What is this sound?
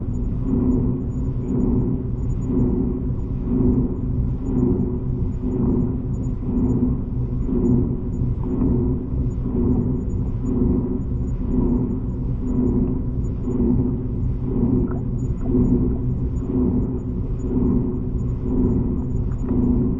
coolingvessel loop
Seamless loop.
Panning drone of something that could be (or inside of) a large fluid-cooling device. Some bubbles here and there.